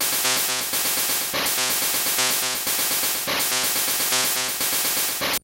I was playing around with the good ol gameboy.... SOmethinG to do on the lovely metro system here in SEA ttle_ Thats where I LoVe.....and Live..!